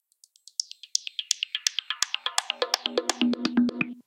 Synthesized sticky sounding ball bouncing down a well.